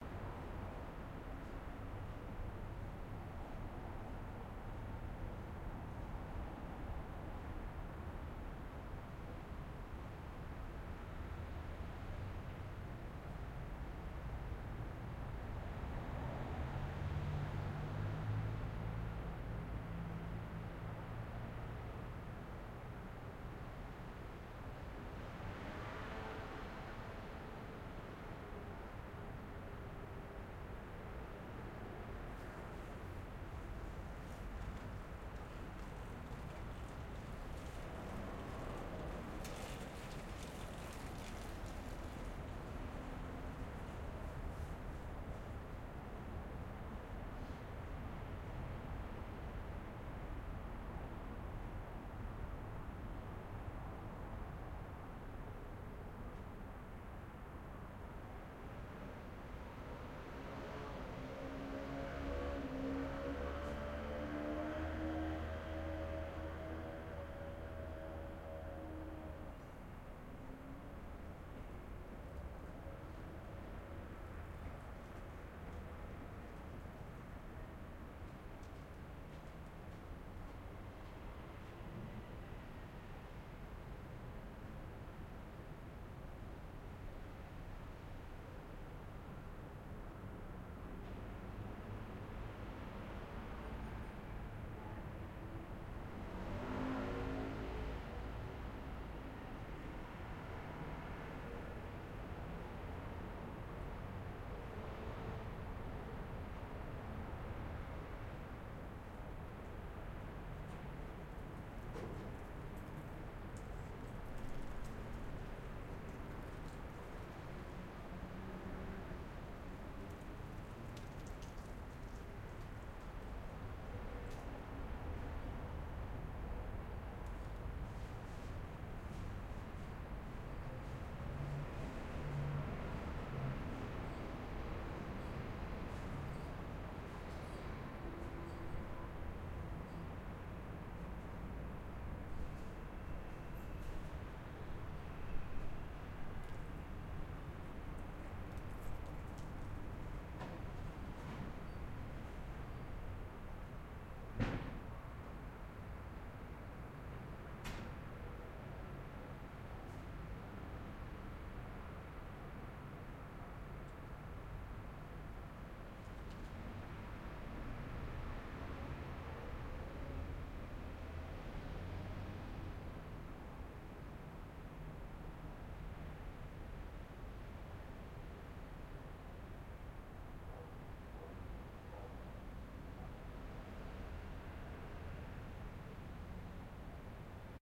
Ambient de ciutat Nocturn Rumble
Nocturn, ciutat, Rumble, Ambience, de